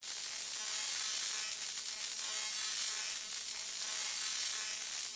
The result of experiments with synthesis, non-standard use of delay and granulator. Enjoy it. If it does not bother you, share links to your work where this sound was used.